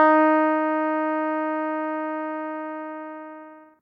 mt40 ep 075
casio mt40 el piano sound multisample in minor thirds. Root keys and ranges are written into the headers, so the set should auto map in most samplers.
digital, synthesised, multisampled, keyboard